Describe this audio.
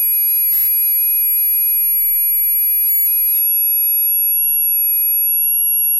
Results from running randomly-generated neural networks (all weights in neuron connections start random and then slowly drift when generating). The reason could be input compression needed for network to actually work. Each sound channel is an output from two separate neurons in the network. Each sample in this pack is generated by a separate network, as they wasn’t saved anywhere after they produce a thing. Global parameters (output compression, neuron count, drift rate etc.) aren’t the same from sample to sample, too.